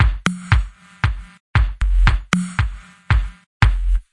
house beat 116bpm with-02
reverb short house beat 116bpm
116bpm, beat, club, dance, electro, electronic, house, loop, rave, techno, trance